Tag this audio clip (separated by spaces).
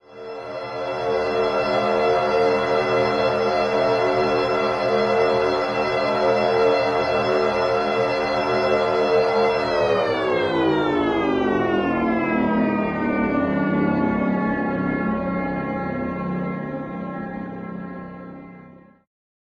alien experiment hover power-down ship sound space synth texture